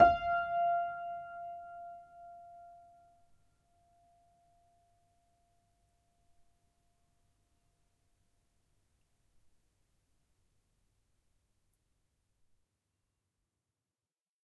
upright choiseul piano multisample recorded using zoom H4n
choiseul, multisample, piano, upright